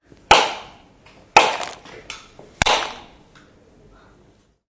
mySound SPS Donë nur
Sounds from objects that are beloved to the participant pupils at the Santa Anna school, Barcelona. The source of the sounds has to be guessed.
Belgium, CityRings, Done, mySound, nur, Stadspoortschool